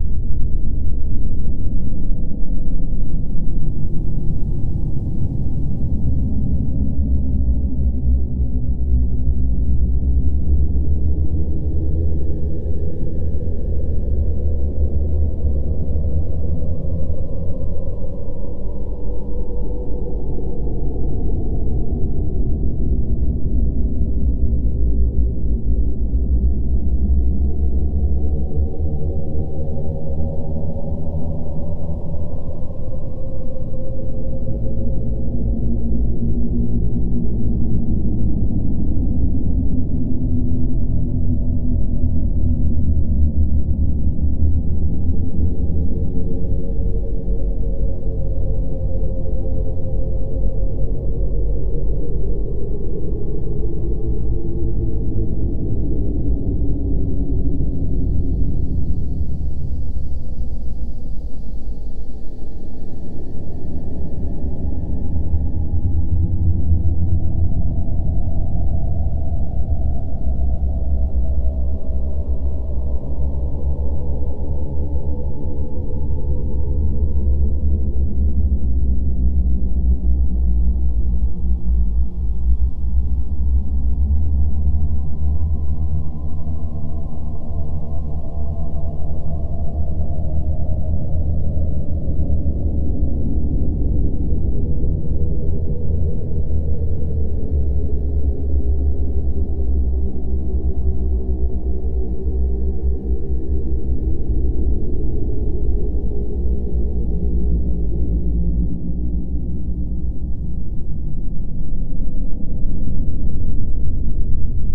Haunting Ambiance
Another creepy, rumbling, 100% seamless ambient soundtrack, this time with some very spooky phantom-like moaning! The only thing missing is some ghostly rattling chains ;)
Made in Audacity.
ambient, creepy, dark, eerie, fear, haunted, horror, loop, loopable, phantom, rumble, scary, seamless, spooky, suspense, voices